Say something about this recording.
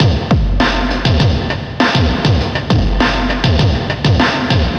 Carlos 100 break
quality, 100bpm, breakbeat, heavy, 100, dance, punchy, underground, drums, high, metal, 100-bpm, grunge, distorted